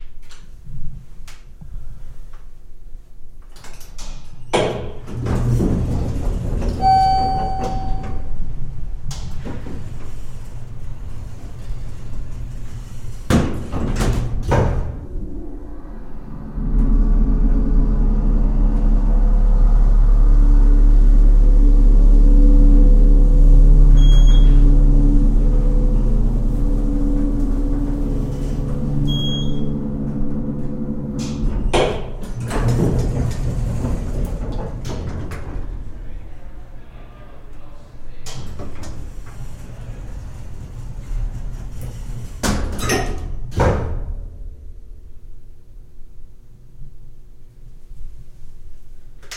Elevator ascending two floors, no peaking, walla outside of elevator
Elevator ascending two floors. No peaking, but some extraneous walla when the doors open. Recorded at Shelby Hall, The University of Alabama, spring 2009.